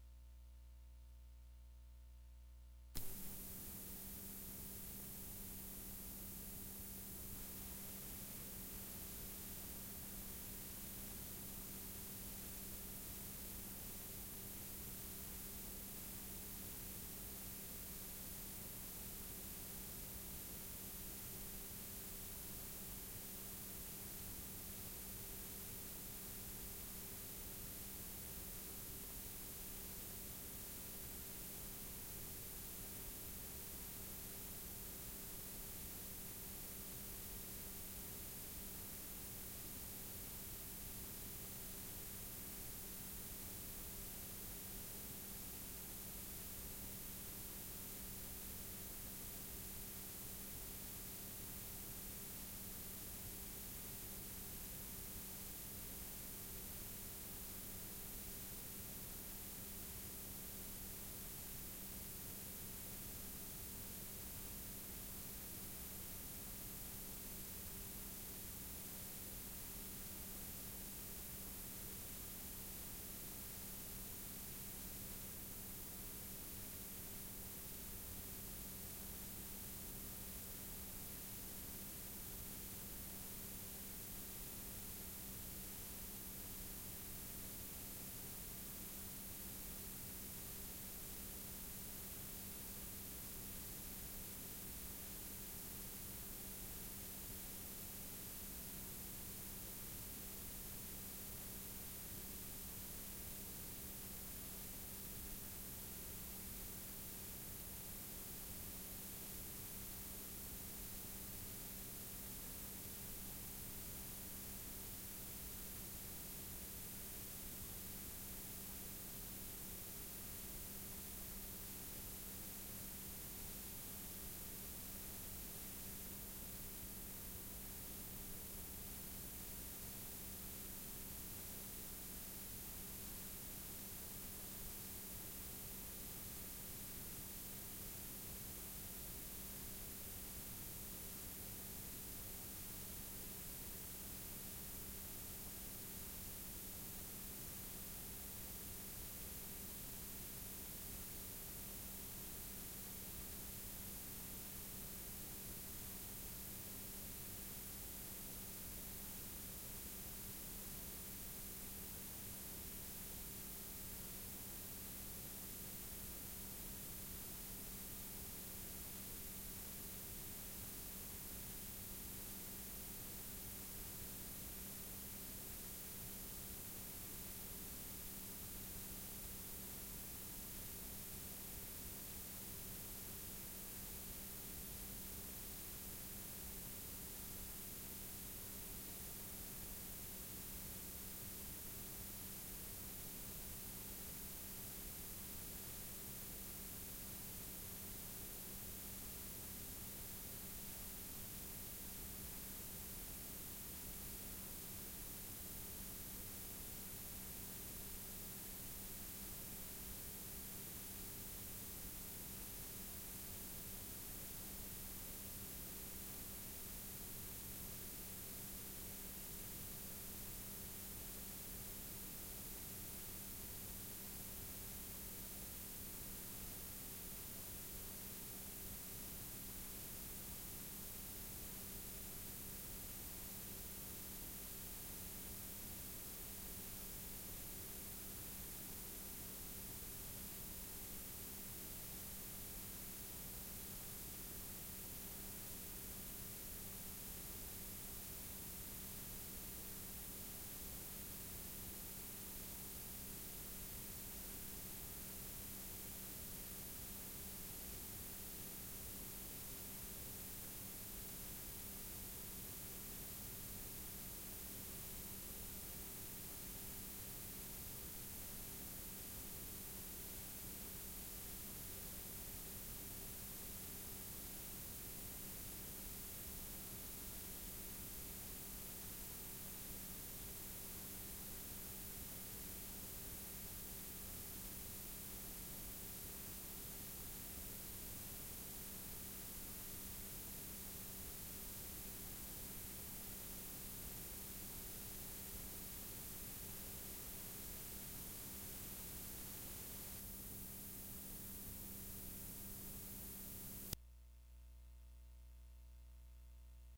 Background noise of a blank k7 tape.
Ruído de fundo de uma fita k7 sem gravação.